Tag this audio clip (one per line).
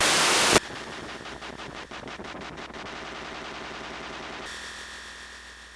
ambeint
circuit-bent
circuits
electro
glitch
noise
slightly-messed-with
static-crush